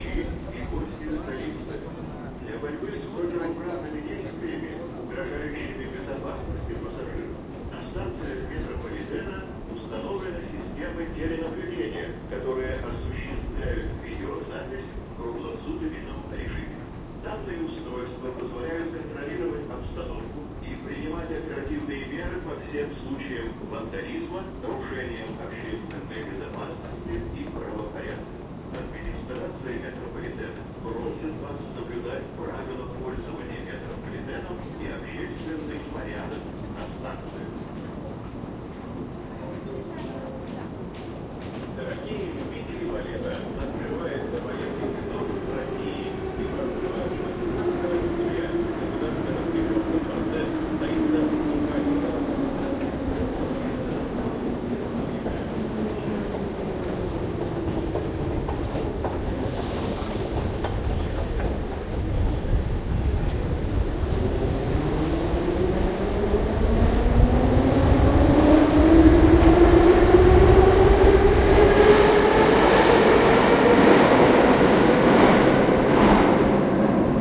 Moscow metro station 1
Escalator in Moscow subway. Announcement. Trains in long distance.
moscow, underground, escalator, subway, russia, metro